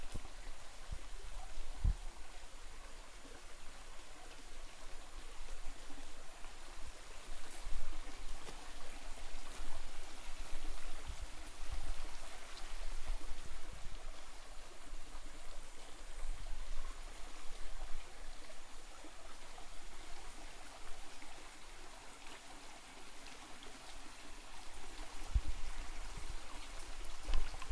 Noche campo
Grabación de un río, ubicado en la ciudad de Quito-Ecuador,